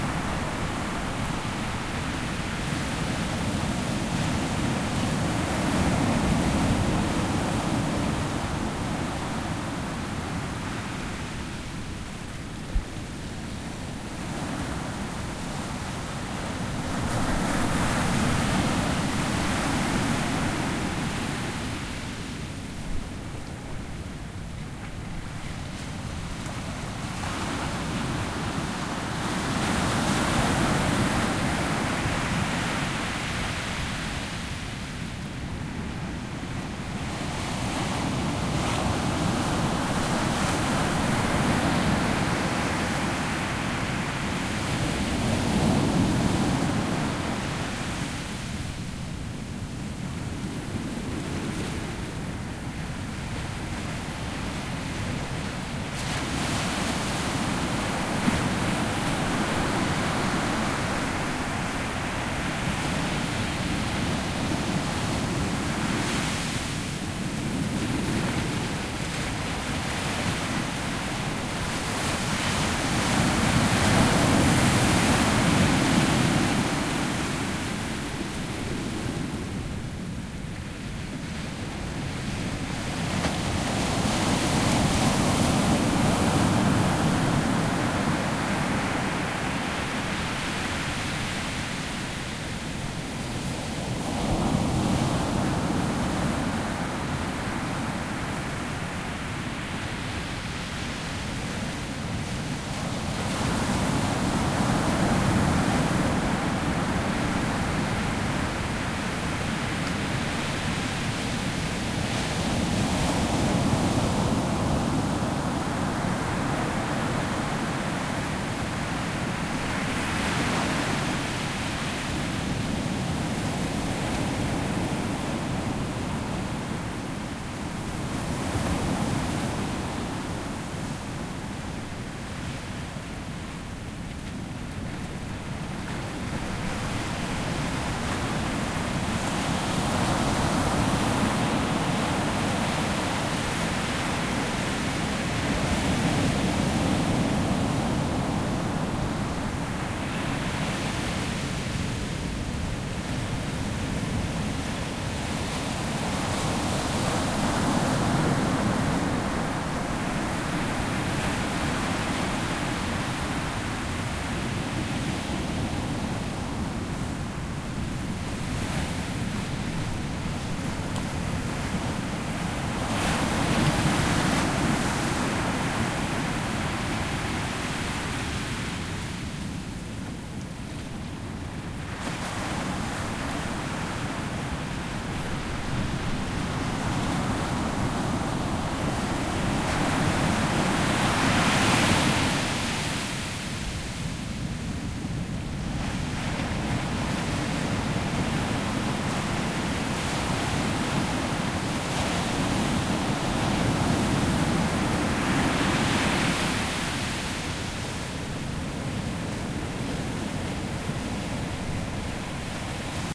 Raw recording of ocean waves at Seal Rock beach on the Central Oregon Coast. Recorded with Zoom H4n handheld recorder. Many thanks for listening and sharing!